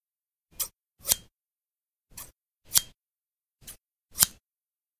In this sound I am opening and closing crafting shears three times. Recorded with a zoomH2